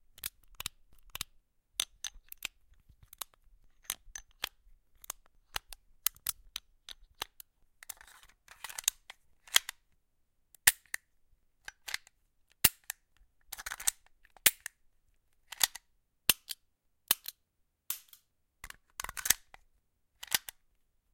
gun handling
High-quality recording of handling two handguns.
clip, gun, weapon, pistol, clicks, cock, handgun, metal, reload